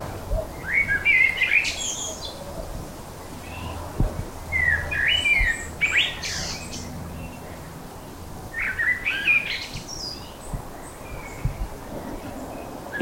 Birds Tree Forest Mastered Natural
Recorded Zoom H1N with Rode mic
Edited: Adobe + FXs + Mastered